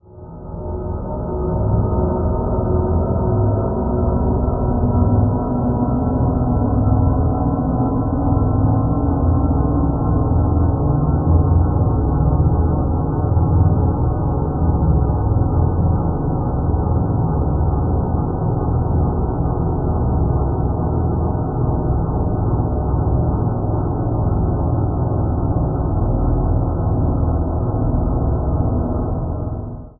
Dark ambient drone created from abstract wallpaper using SonicPhoto Gold.
sonification, img2snd, atmosphere, dark, drone, ambient, dare-22